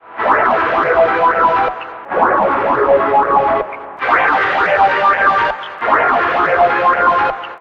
Dãy Nốt
Create from buffalodread's "brighthrsk-music-chunk". 2020.06.06 12.35
Audacity:
Effect→Change Pitch...
- 1. Semitones (half-steps): 0.0
- 2. Semitones (half-steps): -3.0
- 3. Semitones (half-steps): 3.0
- 4. Semitones (half-steps): 1.0
Effect→Amplify...
- New Peak Amplitude (dB): 0.0
Use short Effect→Fade In for begin and Effect→Fade Out for end.